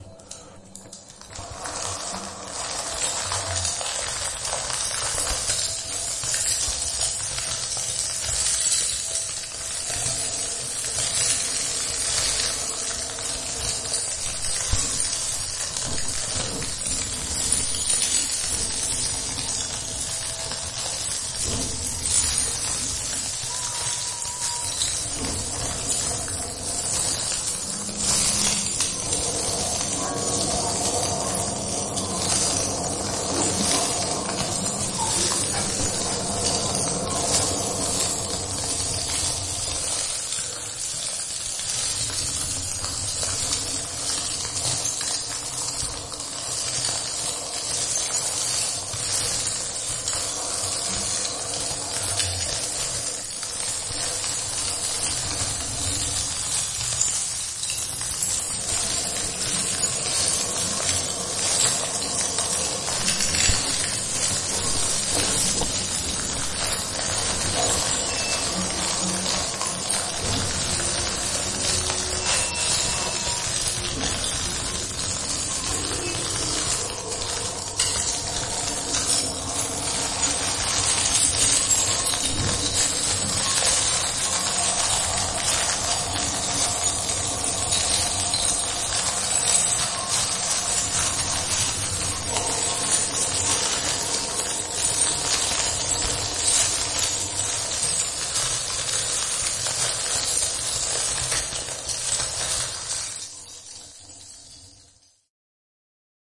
coquillages dans un sac doublé d'une voix dans un tube
voice, mix, tub, shell, bag, plastic